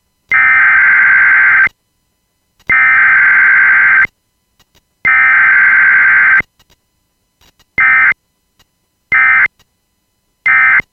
This tone represents the hidden code for: winter storm watch
Storm, Alert, Winter, System